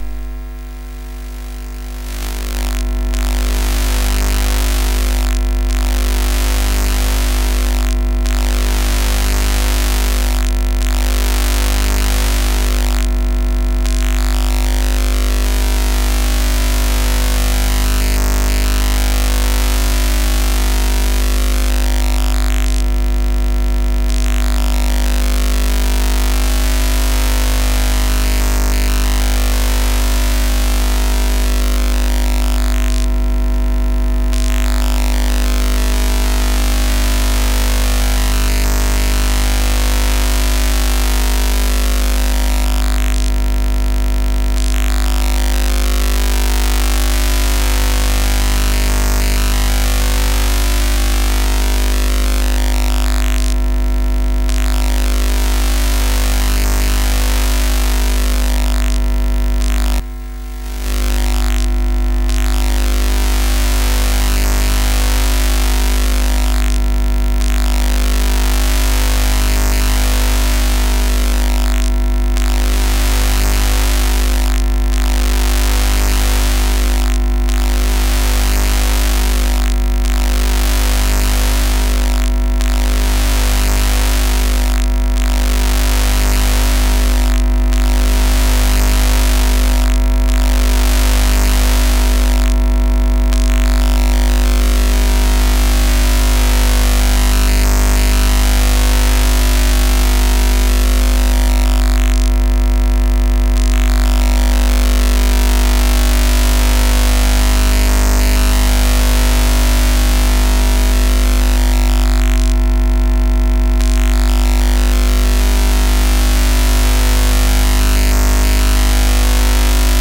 This is the 50 Hz hum with interference from an LED christmas light set that was in slow fade mode. I recorded it with a cable connected to the Line In port of my PC. I was touching the other end of the audio cable with my fingers and with the other hand I was touching the lights.